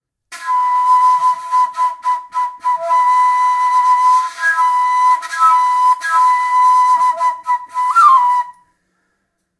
Kaval Play 02
Recording of an improvised play with Macedonian Kaval
Acoustic, Macedonian